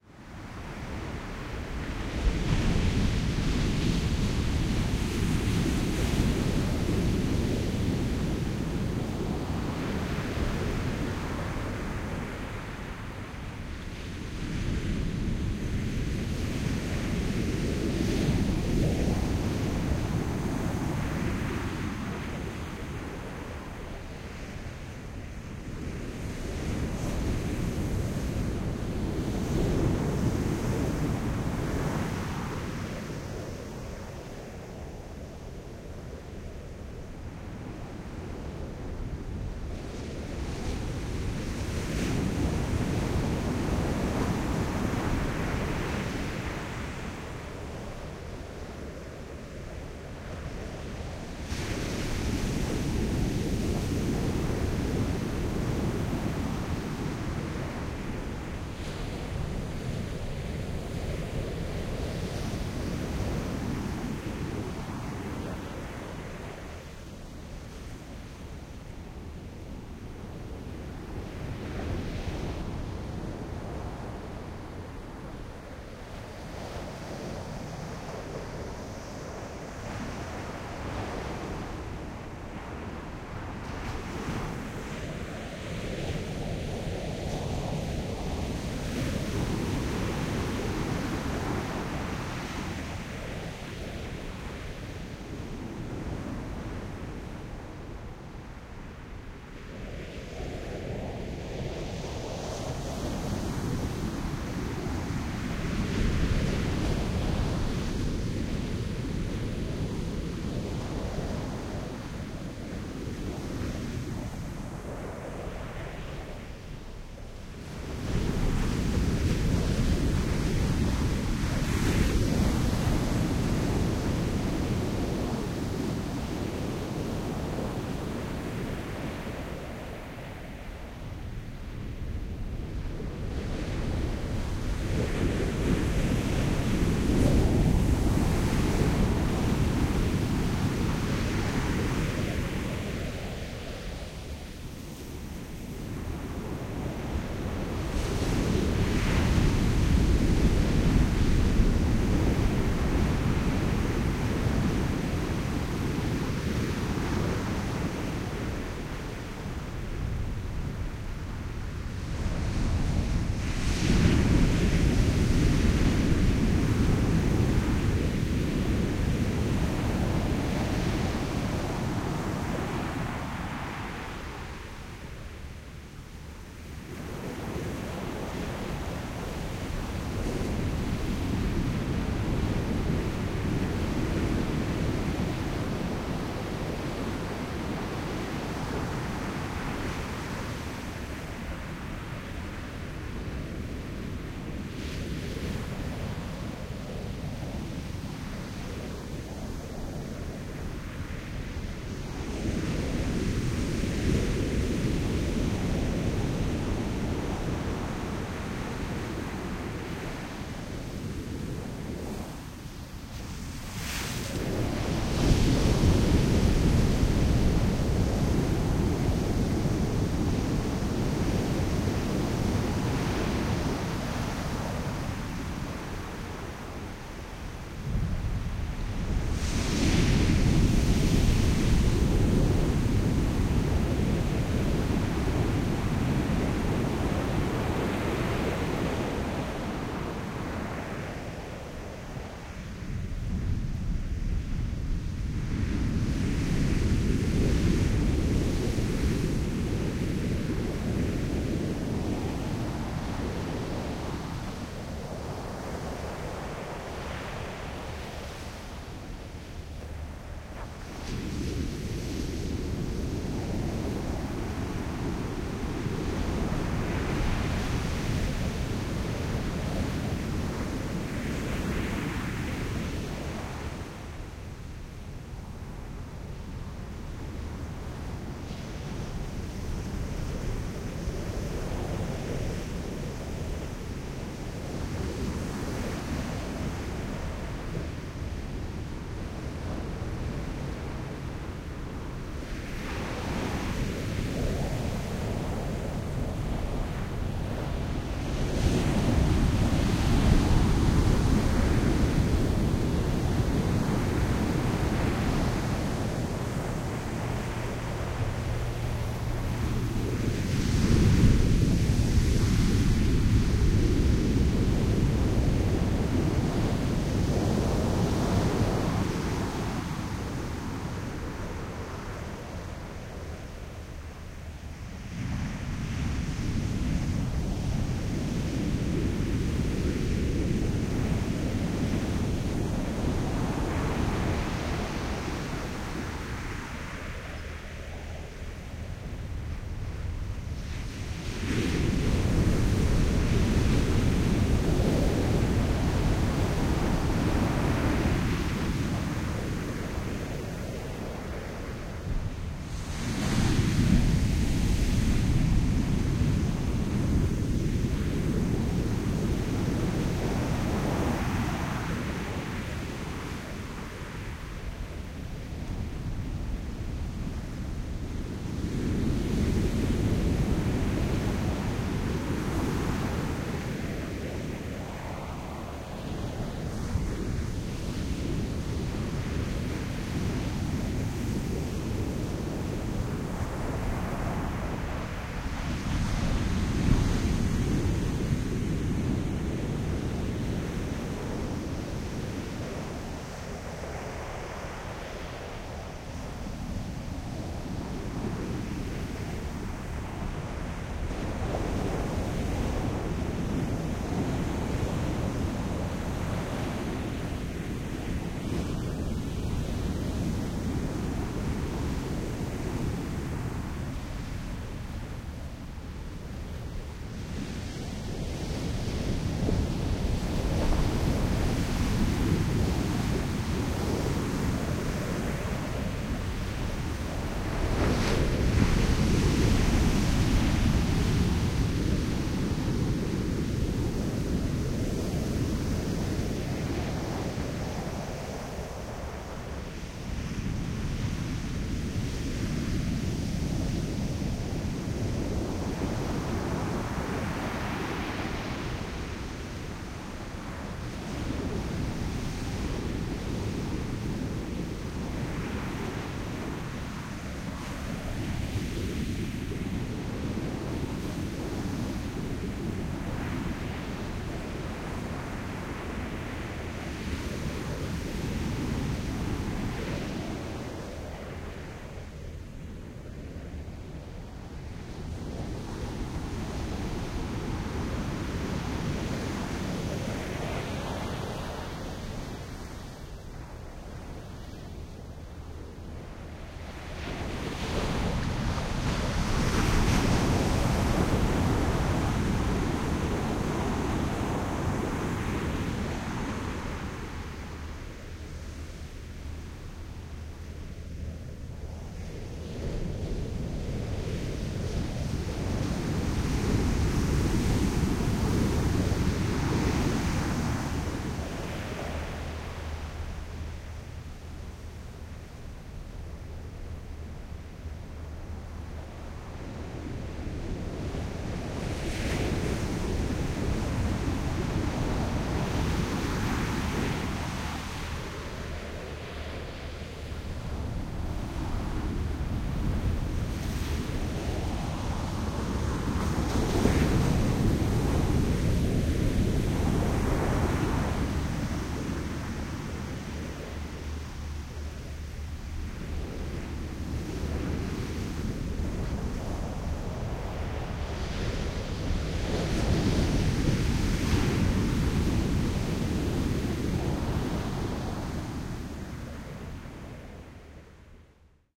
SoundField Ocean Take 4 Harpex Stereo 161205
General beach ambience with waves on sand, no human noise, stereo - recorded on 5 Dec 2016 at 1000 Steps Beach, CA, USA. - Recorded using this microphone & recorder: Soundfield ST350, Zoom F8 recorder; Format conversion and light editing done in ProTools.